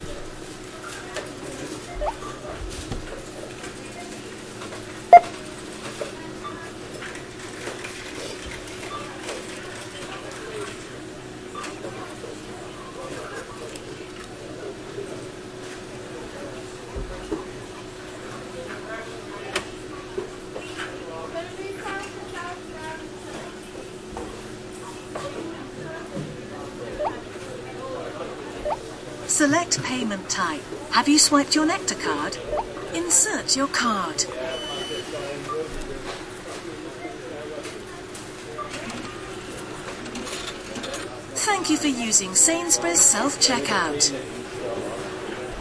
Supermarket - self service machine
A supermarket self service machine in the UK
machine, self, service, supernarket, UK